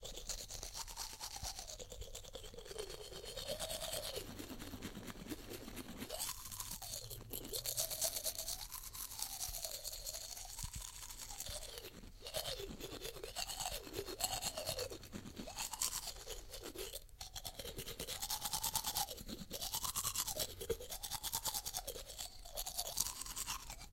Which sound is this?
tooth clean
cleaning the tooths
toothbrush, bathroom, clean, tooth